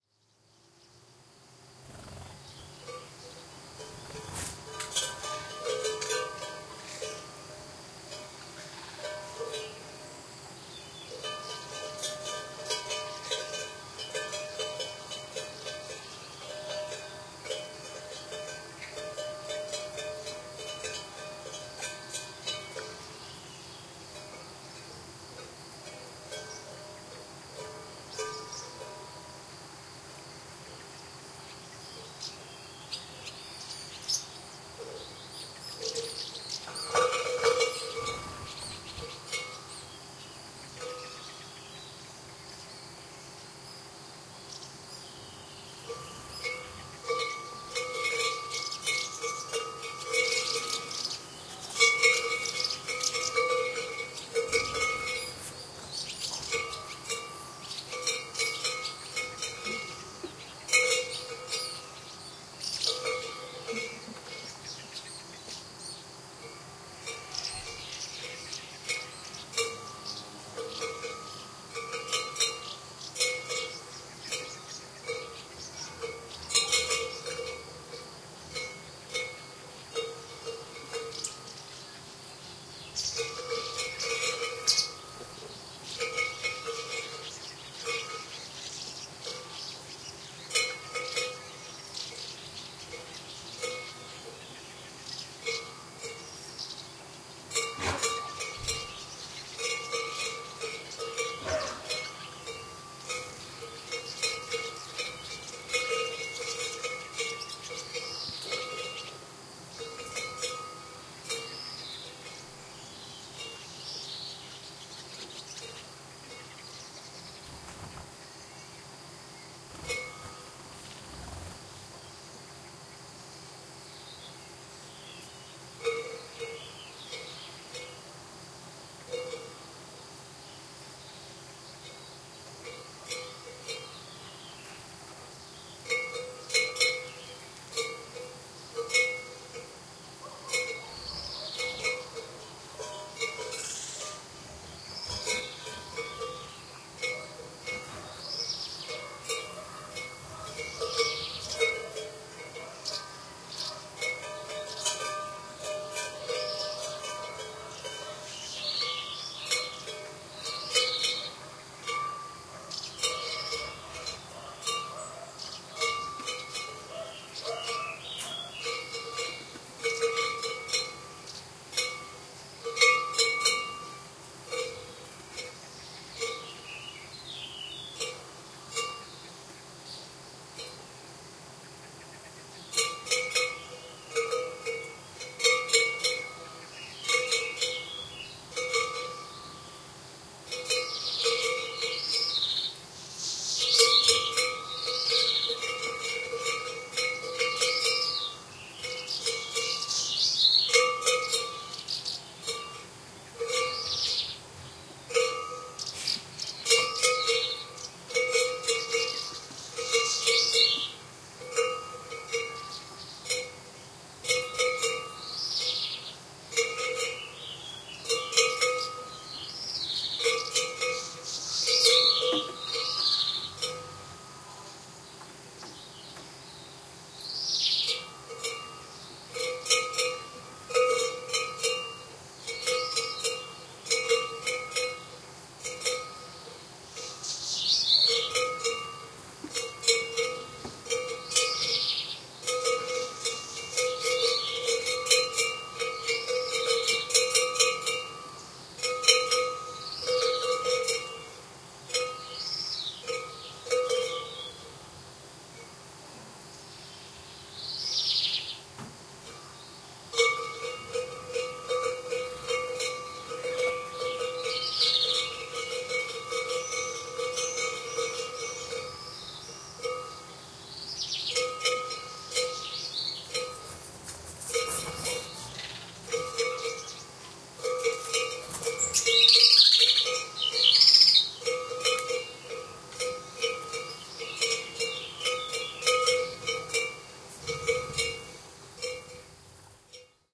20210624.cowshed.twilight.139
Ambiance near a cowshed in the late afternoon, with cowbells, crickets, and birds calling. Matched Stereo Pair (Clippy XLR, by FEL Communications Ltd) into Sound Devices Mixpre-3. Recorded at Mudá (Palencia province, north Spain).
ambiance, barn, birds, blackbird, cattle, countryside, cow, cowbell, farm, field-recording, nature, pastoral, rural, Spain, swallow, village